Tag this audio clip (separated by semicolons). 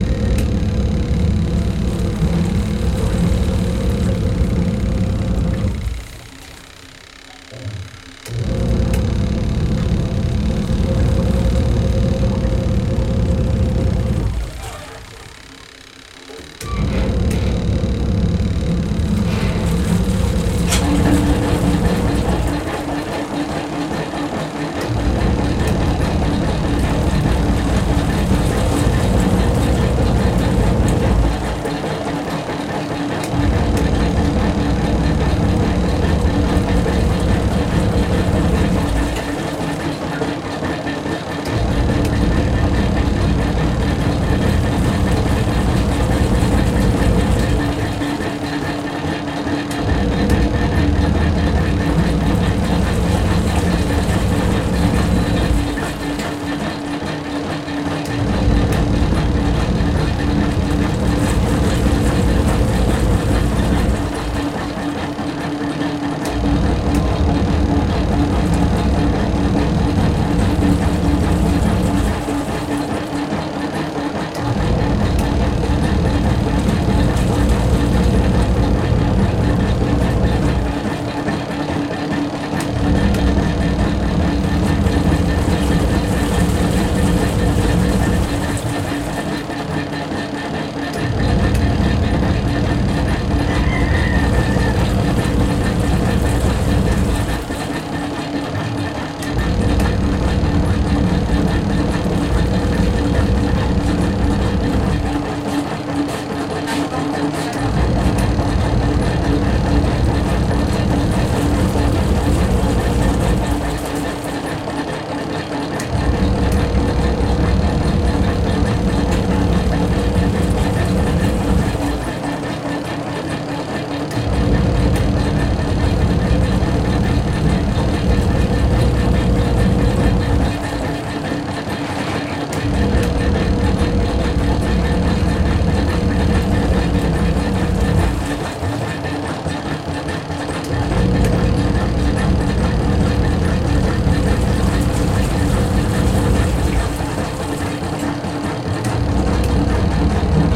machines; washing; lavadoras